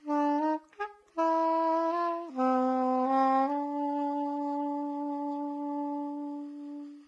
Non-sense sax.
Recorded mono with mic over the left hand.
I used it for a little interactive html internet composition:
melody, sax, loop, saxophone, soprano-sax, soprano